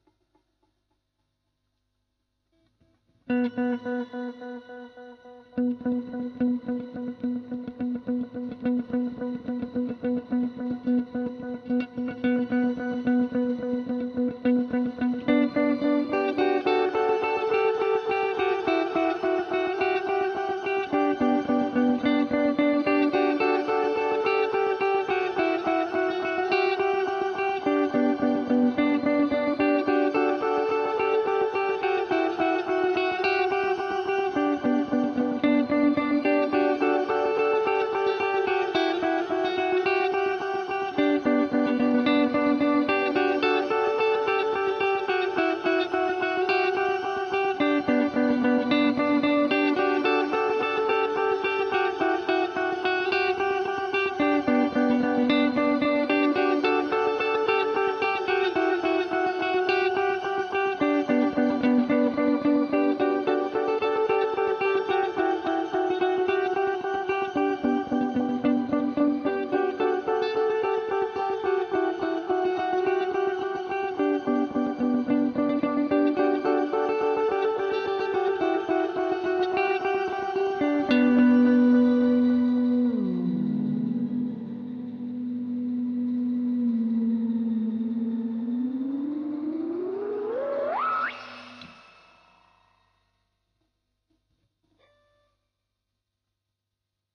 Guitar Space
Simple song using delay and reverberation. Atmosferic and space.
Space, Reverb, psychedelic, Noise, guitar, Echo